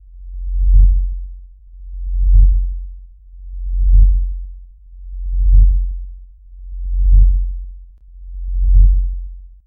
A low bassy throb created using Audacity. I initially used the 'Risset drum' creator. Then randomly altered pitch and speed, increased the bass, then copied and pasted repeats.